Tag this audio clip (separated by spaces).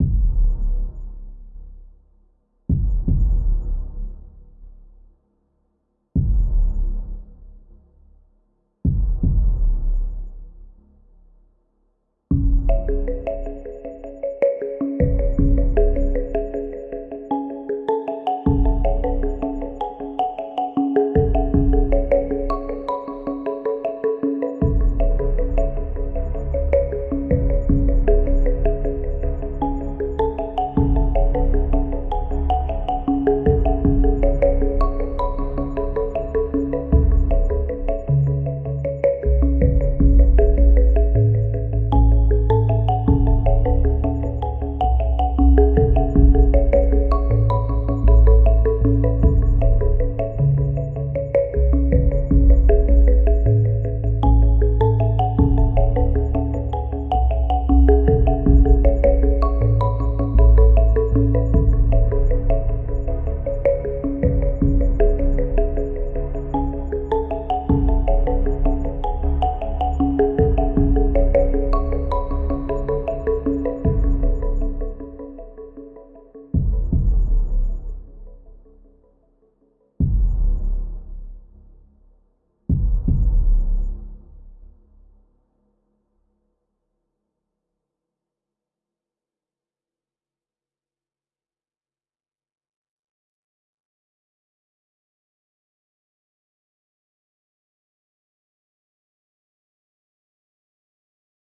atmosphere atmospheric-music calm cinematic dark-music eerie-soundscapes haunting-melodies mellow music music-for-mystery-and-suspense music-for-redemption podcast soundscape synth